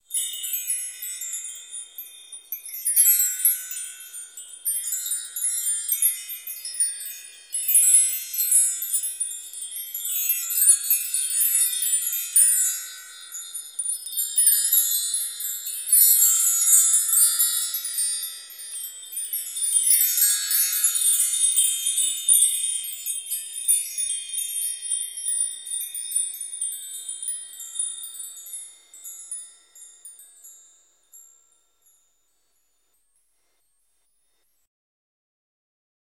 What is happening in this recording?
Set of windchimes similar to a belltree
recorded using Zoom H4n